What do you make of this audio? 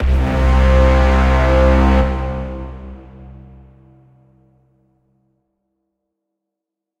2braaaam1 ir
A collection of "BRAAAMs" I made the other day. No samples at all were used, it's all NI Kontakt stock Brass / NI Massive / Sonivox Orchestral Companion Strings stacked and run through various plugins. Most of the BRAAAMs are simply C notes (plus octaves).
fanfare, soundtrack, film, movie, mysterious, battle, epic, hollywood, rap, suspense, scifi, strings, trailer, brass, braaam, orchestral, arrival, inception, hit, dramatic, cinematic, tension, heroic